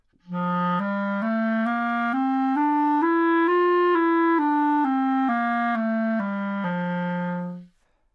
Clarinet - F major
Part of the Good-sounds dataset of monophonic instrumental sounds.
instrument::clarinet
note::F
good-sounds-id::7649
mode::major
Fmajor, clarinet, good-sounds, neumann-U87, scale